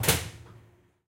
Door close undergroundtrain (One Shot)
A recording of a door closing in a undergroundtrain. If you wanna use it for your work, just notice me in the credits!
close, closing, Door, field-recording, foley, game, hamburg, hvv, movie, short, snap, sounddesign, stereo, train, u3, underground, video